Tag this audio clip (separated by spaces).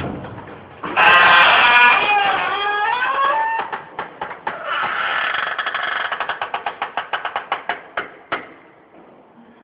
slow squeak